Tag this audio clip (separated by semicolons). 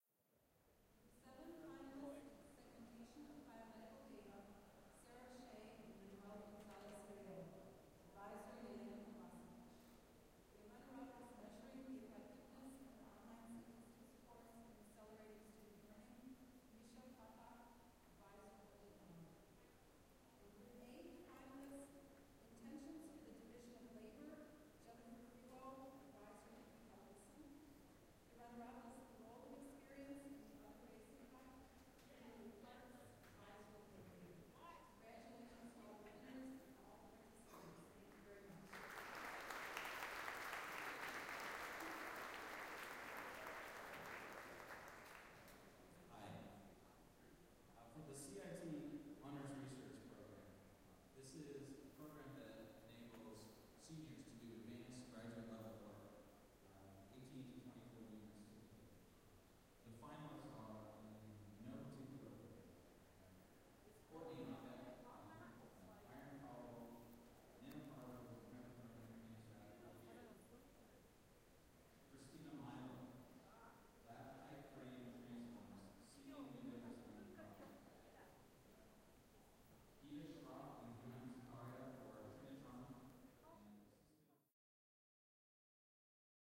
university; binaural